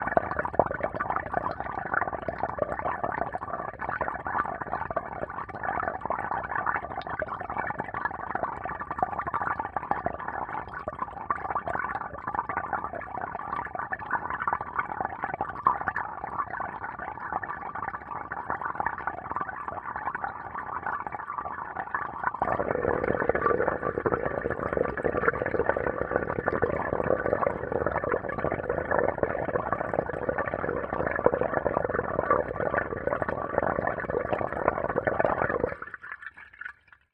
sink washbasin faucet hydrophone03
A hydrophone in a full washbasin, which is filled with water.
dribble, faucet, hydrophone, sink, underwater, washbasin, washbowl, water